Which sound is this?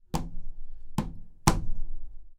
14. Saltos niña
jump, kid